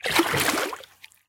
Water Paddle med 004
Part of a collection of sounds of paddle strokes in the water, a series ranging from soft to heavy.
Recorded with a Zoom h4 in Okanagan, BC.
field-recording, water, boat, paddle, river, splash, lake, zoomh4